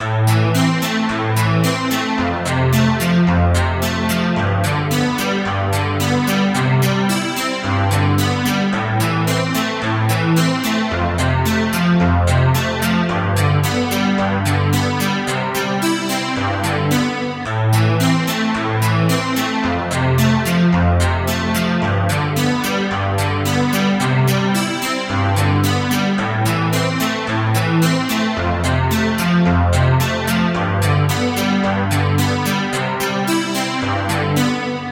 Music Loop 110bpm